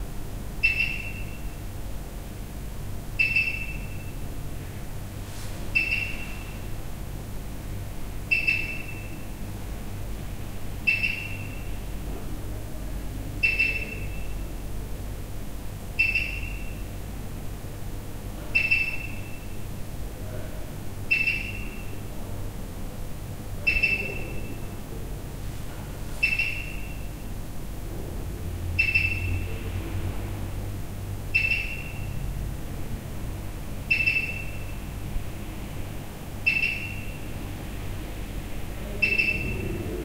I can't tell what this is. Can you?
20131119 telecom signal
Beep sound of ISP equipment on the 9 floor porch.
Recorded: 2013-11-19
XY-stereo.
Recorder: Tascam DR-40
telecom, sign, beep, ambient